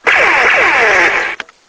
This is a toy guitar.
guitar, toy